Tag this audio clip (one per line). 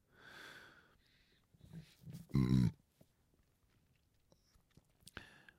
burp
male
reaction
voice